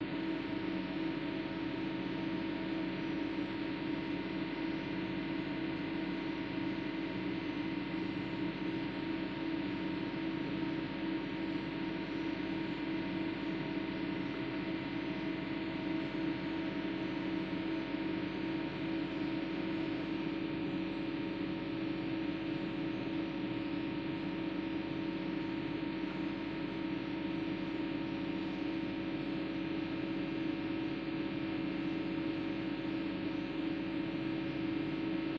Everybody has left, the elevators have come to a stand still but ventilators, compressors and other electrical equipment is still humming before switching to stand-by mode. Recorded with a Nagra Ares-PII+ with the Nagra NP-MICES XY stereo mic. Applied some low end roll off EQ, denoising and limiting.